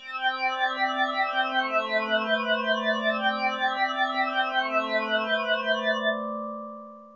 Mind Ambient 13
This is the Nord Lead 2, It's my new baby synth, other than the Micron this thing Spits out mad B.O.C. and Cex like strings and tones, these are some MIDI rythms made in FL 8 Beta.